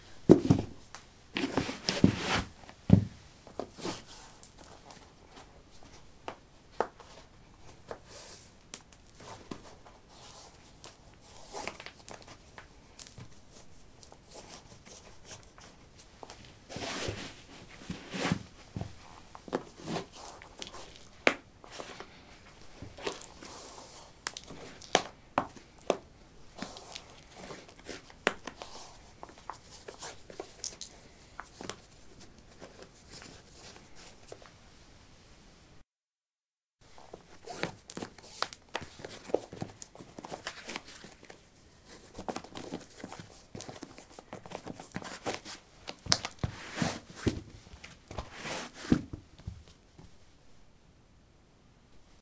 concrete 16d trekkingshoes onoff
Putting trekking shoes on/off on concrete. Recorded with a ZOOM H2 in a basement of a house, normalized with Audacity.